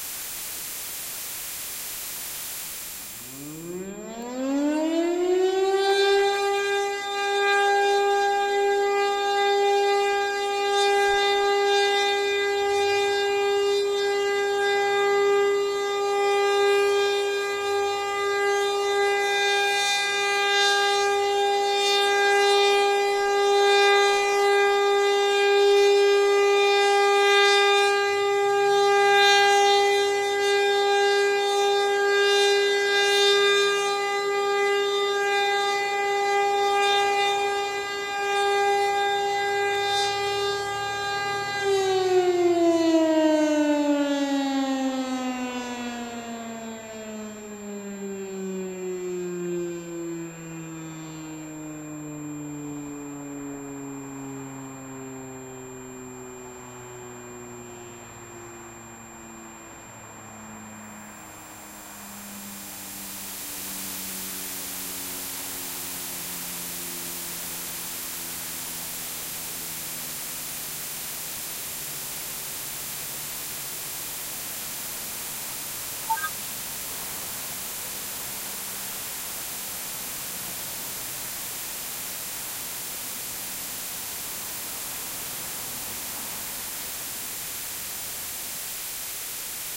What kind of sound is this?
I Have Some News With That Old Footage While Going On Italy Holiday Day In December 21 2009!
And Also I Remember I Forgot My Wife’s Purse And We Stop Over With A Random Village Also I Grabbed My Camera And My Microphone I Start Recording And 1 minute I Stop Recording I’m Sorry
This Audio Is Short And We Go Back To My Mom’s Car And Driving Away From Italy From The Beach
2006, 2007, Czech, Disk, Horn, Old, Old-Camera, Republic, Sound